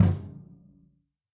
Toms and kicks recorded in stereo from a variety of kits.
acoustic, drums, stereo